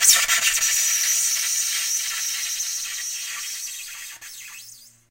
Bowed Styrofoam 2
Polystyrene foam bowed with a well-rosined violin bow. Recorded in mono with a Neumann KM 184 small-diaphragm cardioid microphone from 5-10 inches away from the point of contact between the bow and the styrofoam.
bowed, harsh, harsh-noise, high-frequency, howl, noise, plastic, polystyrene, styrofoam